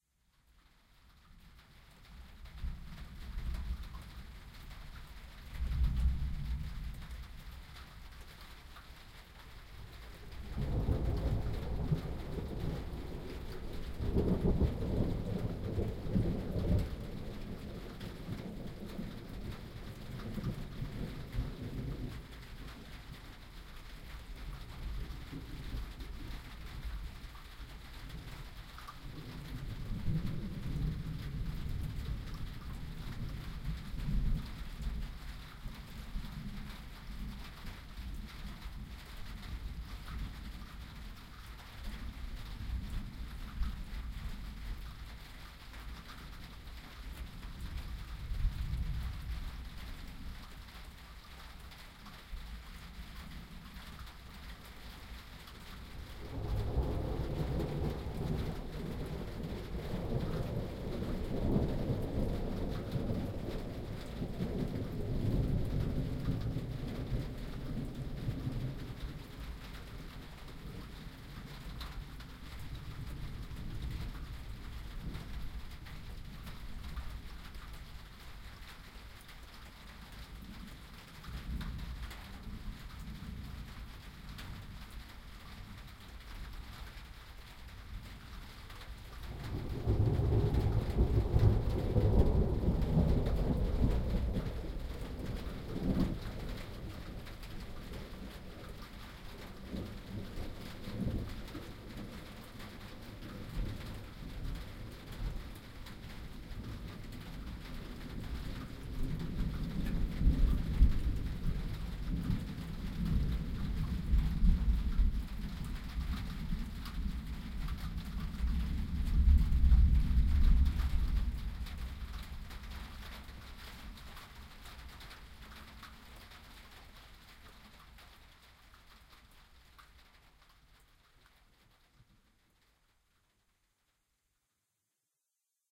Rain and thunder on my porch, beneath a tin roof.
Equipment used: Sound Professionals SP-TFB-2 In-Ear Binaural Microphones > Zoom H2